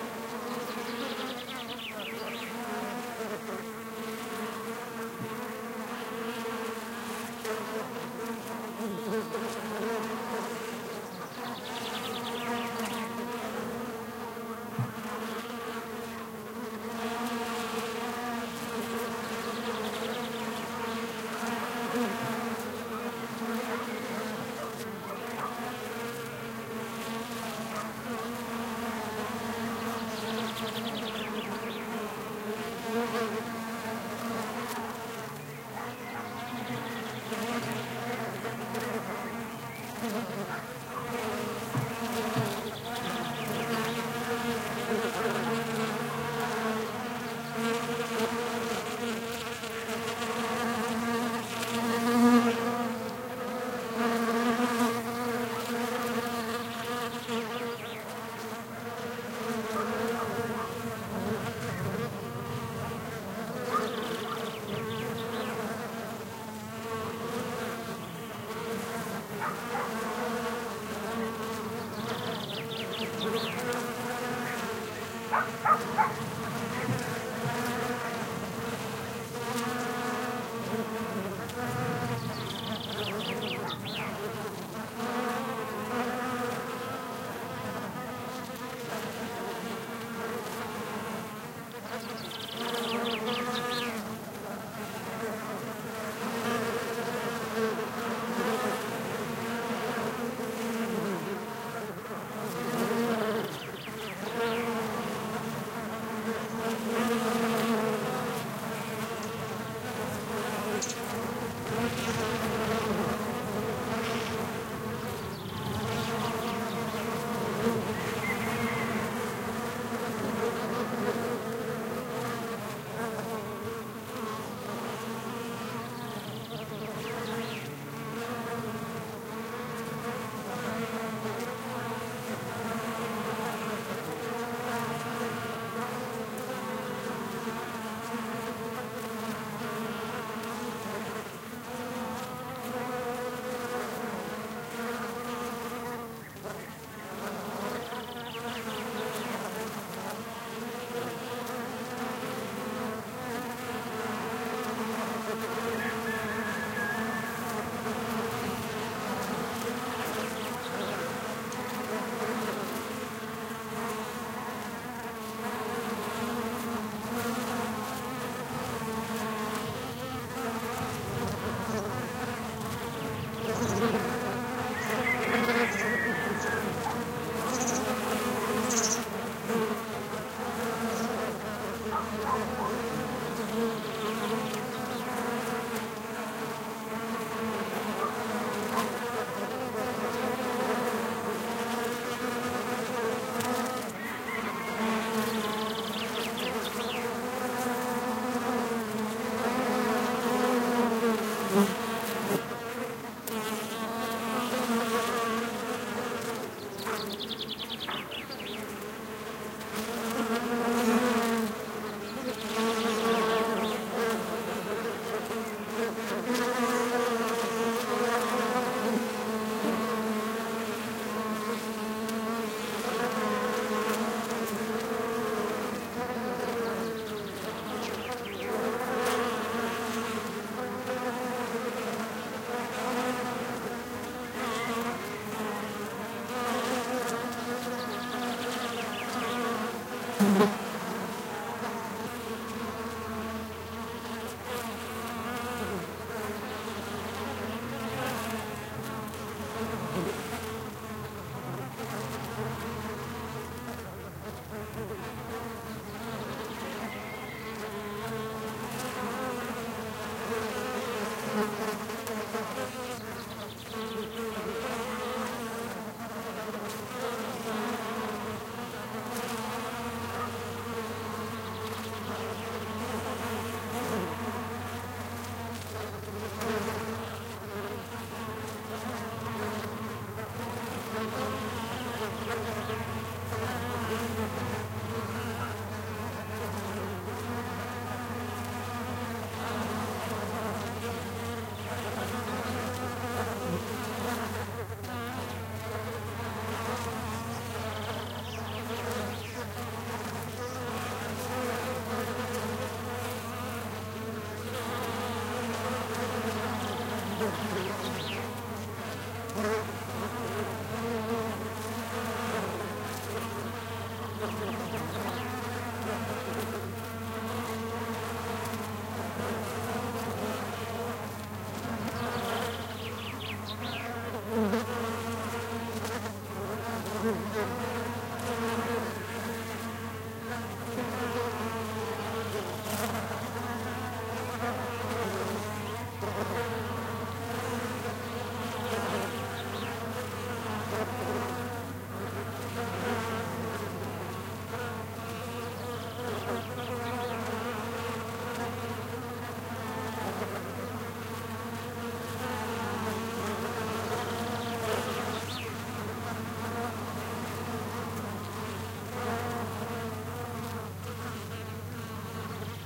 20100423.rosmary.shrub
Buzzing bees on a Rosemary shrub, background with birds singing, dogs barking, and Rooster calls. Some wind noise. Sennheiser MKH60 + MKH30 into Shure FP24 preamp and Olympus LS10 recorder. Decoded to mid-side stereo with free Voxengo VST plugin. Recorded near Castril, Granada (S Spain)
dogs, buzz, bees, spring, birds, cock, rosemary, field-recording, flowers